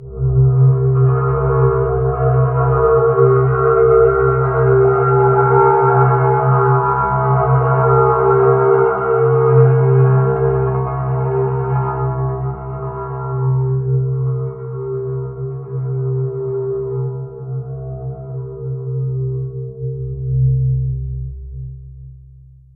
kaivo texabstrdrone
like a metallic cello or detuned guitar played with a slider
ambient, artificial, drone, space